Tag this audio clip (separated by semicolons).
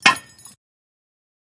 hi-hats; field-recording